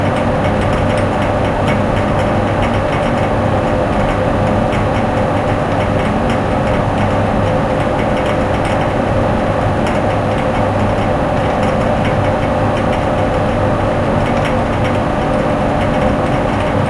Engine Room
Seamlessly looping engine sound room.
electric, engine-room, industrial, machine, motor, noise